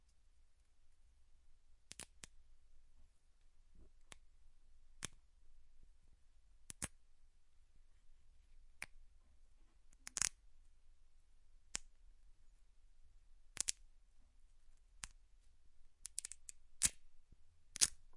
Breaking bone foley recorded using a zoom h5 and some raw pasta